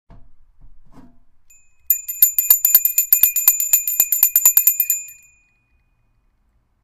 Bell, ringing, ring
Bell, ring, ringing